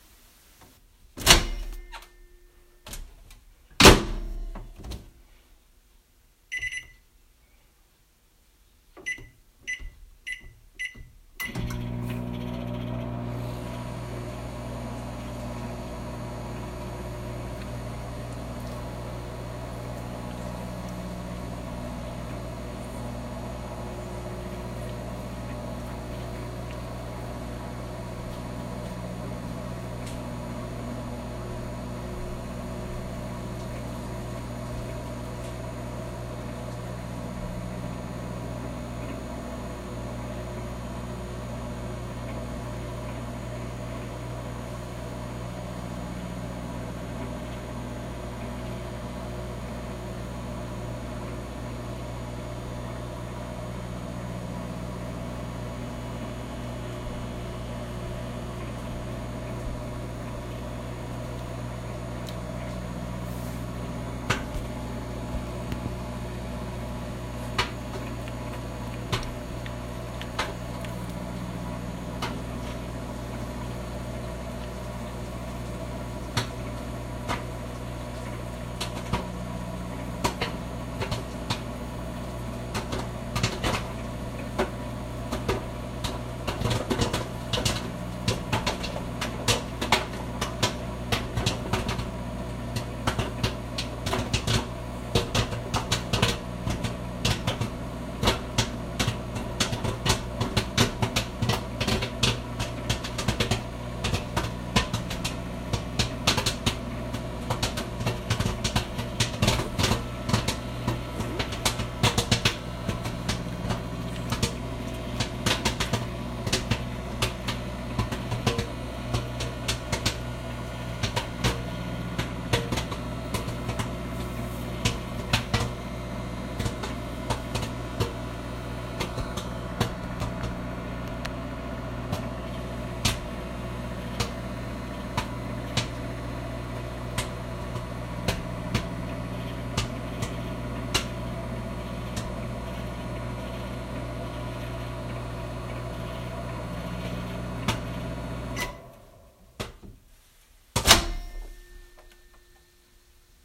Sound of microwave popcorn cycle, including microwave opening and closing